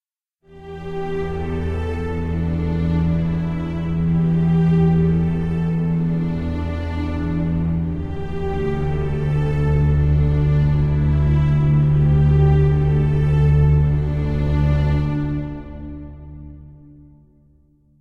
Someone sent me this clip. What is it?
made with vst instruments
ambience, ambient, atmosphere, background, background-sound, cinematic, dark, deep, drama, dramatic, drone, film, hollywood, horror, mood, movie, music, pad, scary, sci-fi, soundscape, space, spooky, suspense, thiller, thrill, trailer